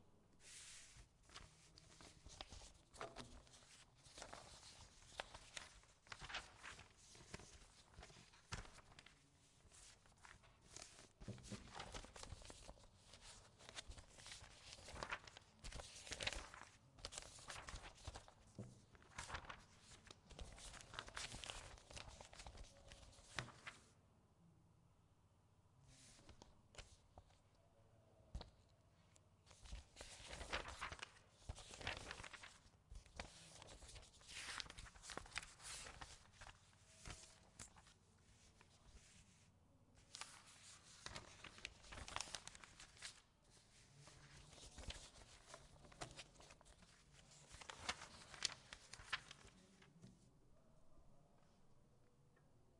paper long
effect, paper, sound